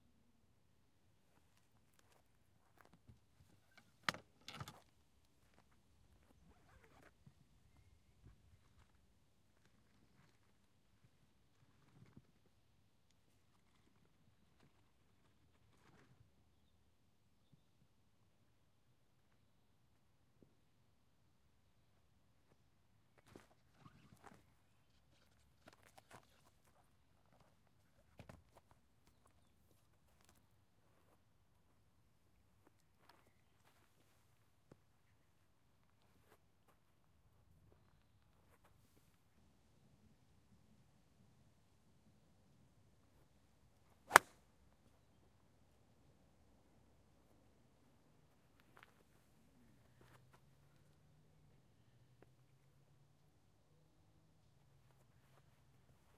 HSN golf driver Yonex
Golf hit with a Yonex driver.